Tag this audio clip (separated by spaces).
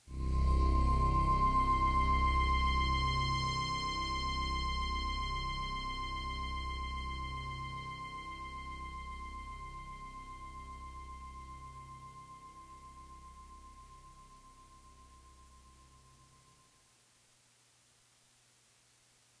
drama dramatic realization spooky suspense